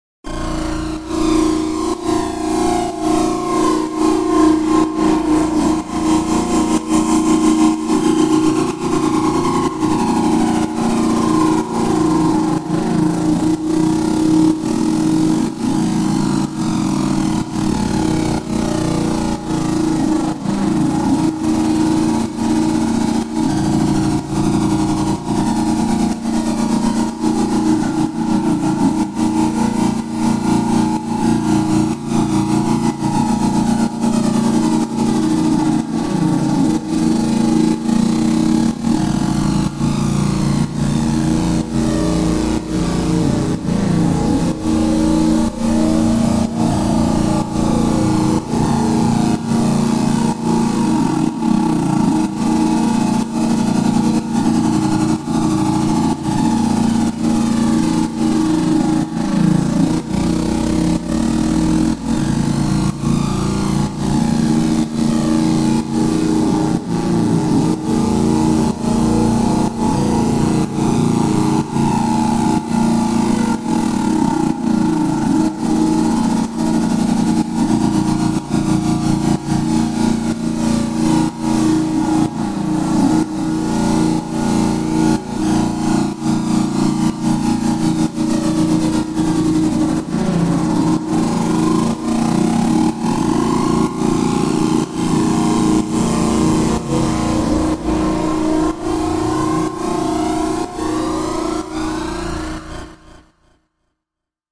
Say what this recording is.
16 - NoiseFreqRiseNFall SC Fm 24b48 AM
ambience, atmos, background-sound, chained, side, soundscape, sythesized